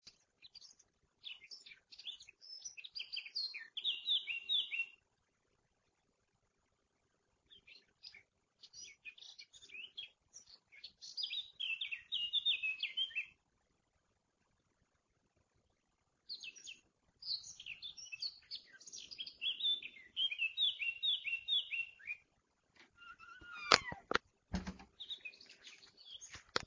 I was working in my garden when I heard this fabulous bird singing. Recorded in(Gutendorf) Austria in Sommer (15 July 2015)with my Samsung (not smart) handy. If anyone knows which type of bird is singing, I would be glad to also find out.